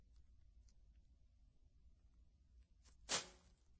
Throwing Paper In Trash
Ball of paper being thrown in trash with trashbag